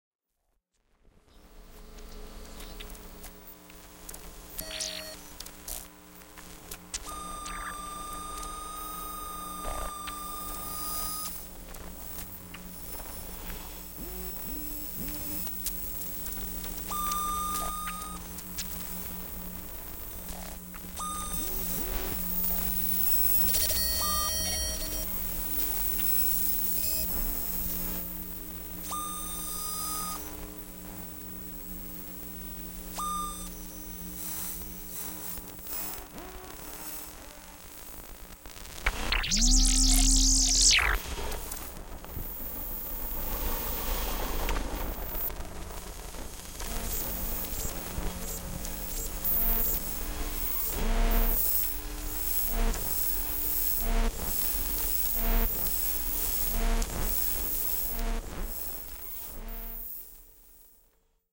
Earth SETI pulses NASA
Search for Extra Terrestrial Intelligence, life on other plannets. These are sounds recorded with a contact microphone, from different electronics, meant to emulate what a satelite might record coming from an extra-terrestrial transmission.Mastered in Logic 7 pro.
competition, earth, nasa, pulses, seti